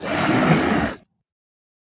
Monster roar 4
A monster roaring.
Source material recorded with either a RØDE Nt-2A or AKG D5S.
beast, creepy, growl, haunted, horror, Monster, monsters, roar, scary, scream, sfx, spooky, terror, wail, wheezing